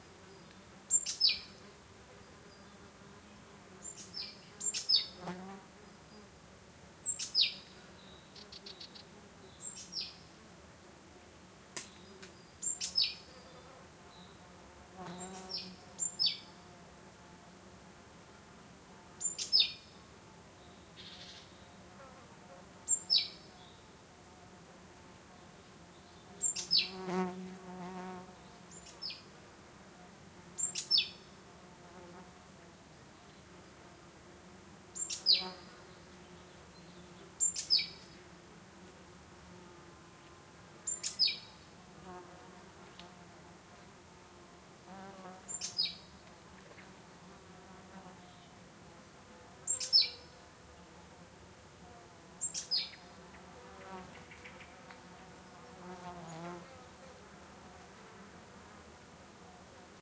20070918.late.summer.03
a single bird species and flies. In scrub near Doñana, S Spain
ambiance, nature, birds